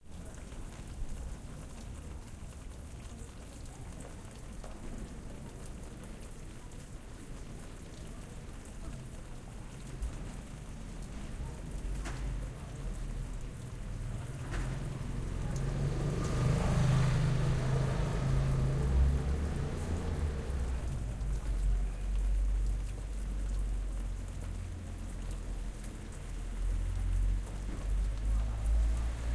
Paris - fontaine - rue - passage moto - CALME
By a fountain in Paris
fountain, france, paris, pedestrian